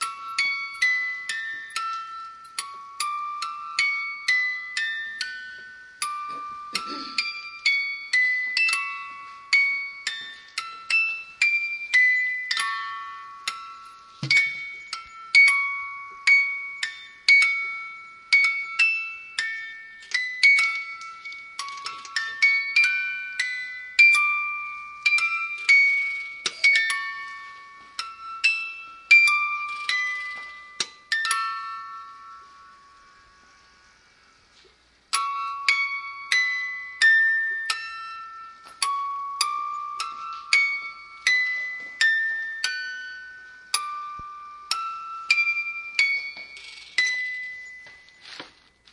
Noisy version with winding down part of santa head music box recorded with DS-40.